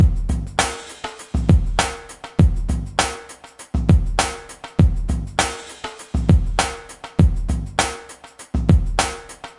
this downtempo drumloop is way better than the song it went with. Drum loop created by me, Number at end indicates tempo